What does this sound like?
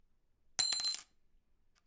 Little Metal Piece Drop
A small piece of metal dropping